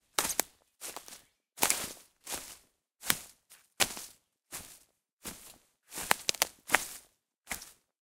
walk-forest02
Walking in a forest.